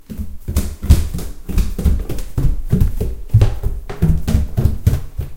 Going up stairs in a hurry
floor, footsteps, run, running, stairs, steps, upstairs, walk, walking